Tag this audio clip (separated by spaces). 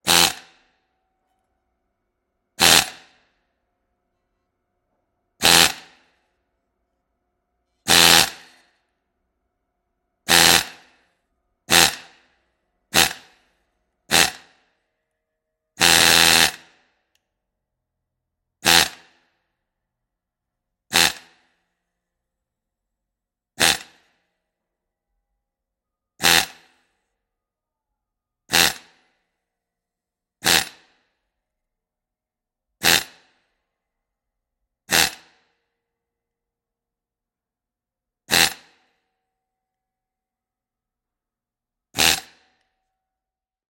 scale-and-paint-removal Power-Tool Machine-shop